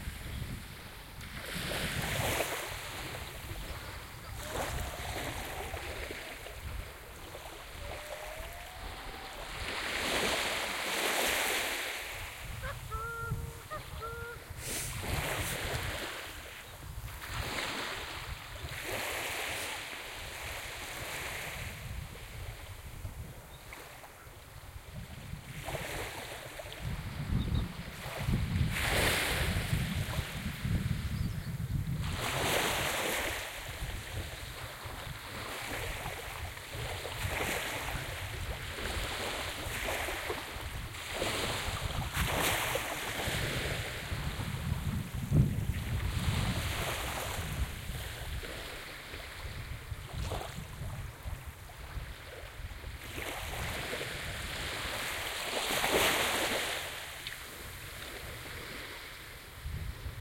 Beach near Oban
The sands of Ganavan are only a couple of miles north of Oban, apart from a stupid holiday housing scheme a great beach. It was just too windy to record and thanks Graeme for the sound affects :-) . OKM binaural microphones with A3 adapter into R-09HR recorder.